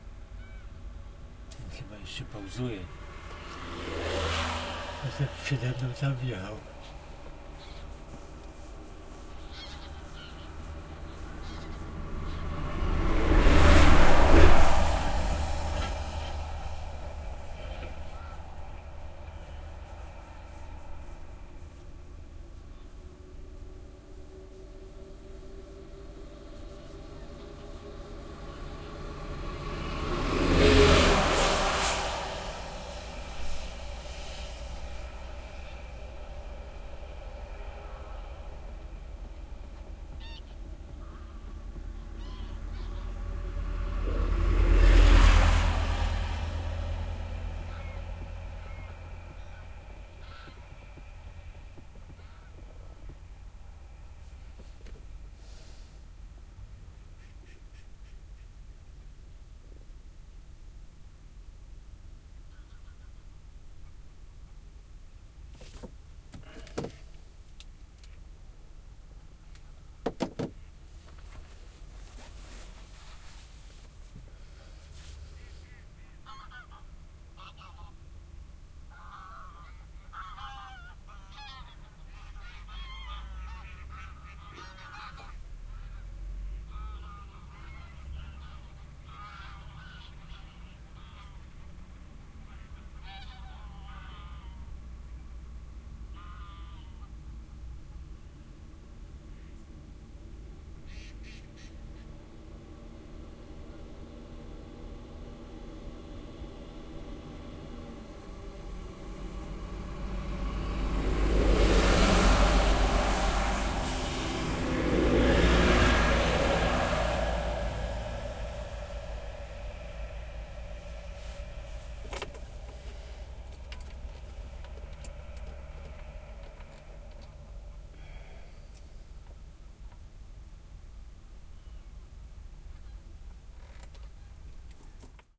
110815-in the line at 5.15 in gluckstadt
15.08.2011: sixteenth day of ethnographic research about truck drivers culture. Germany, Gluckstadt. 5.15 a.m. waiting in the line for the river Elba Ferry. Some talking, sound made by geese, passing by cars.